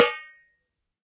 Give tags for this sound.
multisample
velocity
tom
1-shot
drum

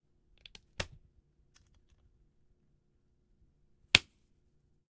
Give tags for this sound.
binder
click
close
file
metal
open
snap

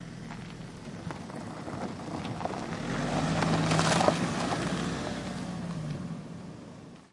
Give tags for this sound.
renault
road
gravel
car
bird
vehicle

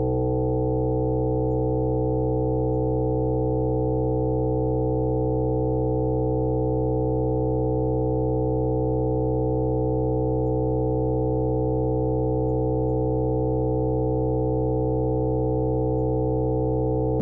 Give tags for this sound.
buzz
electric
electricity
electro
electronic
hum
magnetic
noise
power
substation
transducer
transformer

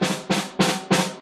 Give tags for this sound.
Cutted
Sample
Snare

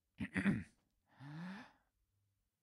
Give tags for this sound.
vocal
sfx
strange
cartoony
man
breath
sound-design
effects
foley
sounddesign
short
gamesound
murmur

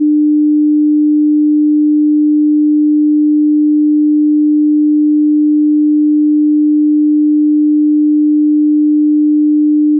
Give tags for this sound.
hearing-test
sine-wave
tone

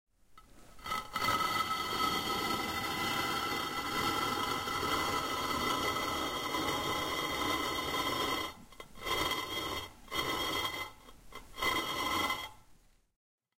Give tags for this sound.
slabs
sliding
scraping
tomb
cement
moving
concrete

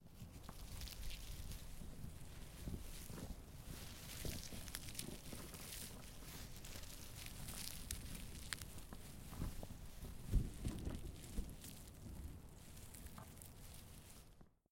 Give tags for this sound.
leaves
scl-upf13
smooth
wind

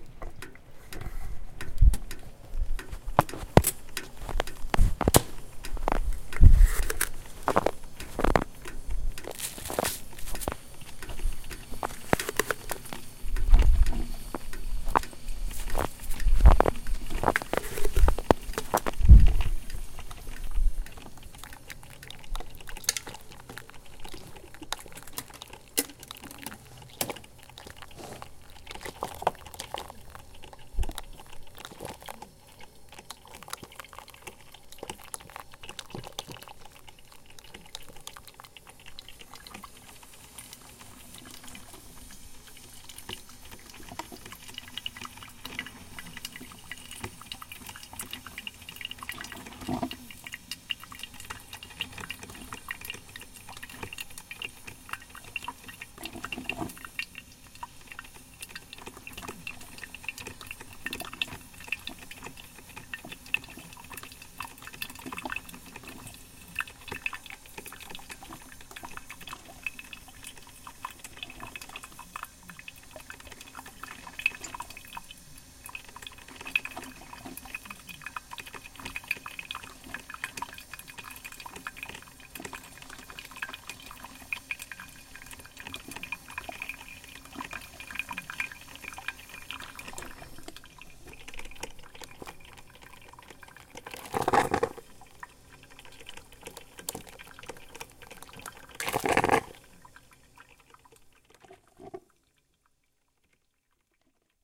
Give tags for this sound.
coffee-grounds
scoop

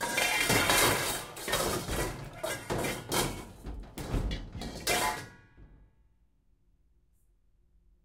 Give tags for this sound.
pans
trashcan
kitchen
rummaging
pots

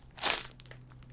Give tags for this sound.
bone
broke
hurt
noise

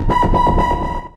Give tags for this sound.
synth
multisample
one-shot

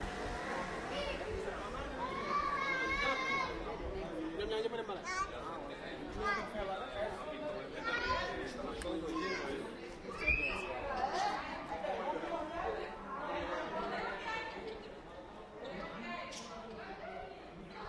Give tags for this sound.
african
ambient
city
conversation
lavapies
madrid
voice

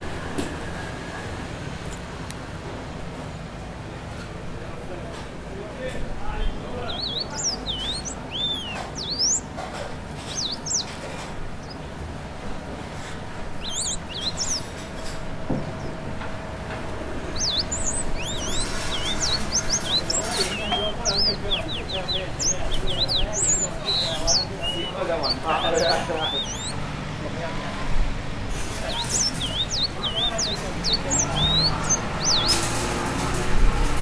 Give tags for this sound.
truck
noise
old-men
birds

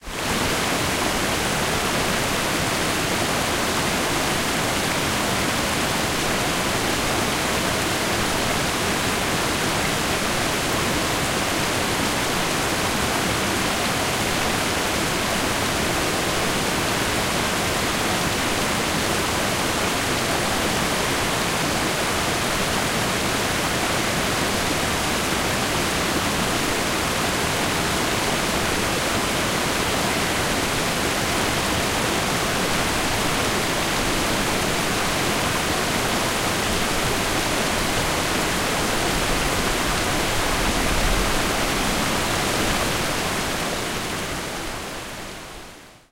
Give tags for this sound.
ambience
krka
water
falls
field-recording
waterfall

